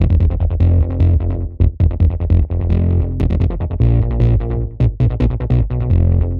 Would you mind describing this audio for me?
(F) 150bpm fat pulsed distorded bass beat loop.